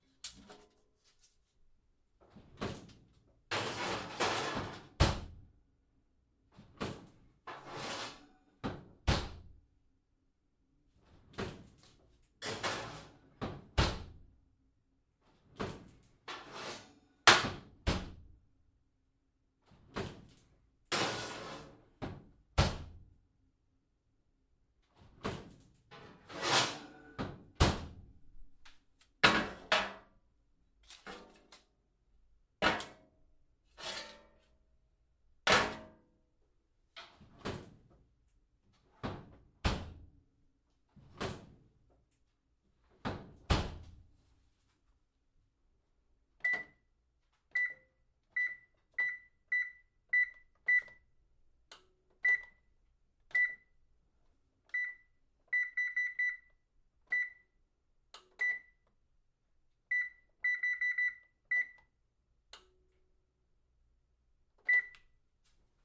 Putting a metal sheet in the oven (and then pulling it out). Oven door opens and closes, then some buttons on the oven are pressed.